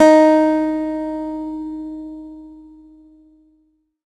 Sampling of my electro acoustic guitar Sherwood SH887 three octaves and five velocity levels
acoustic, multisample, guitar